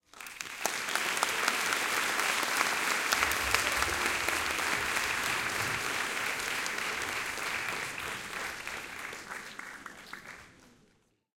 220625-08 FR Applause
Applause in a medium sized theatre / concert hall (8th file).
Sample extracted from the video of the last biennial show held by the school in which my daughter is learning ballet.
Fade in/out applied in Audacity.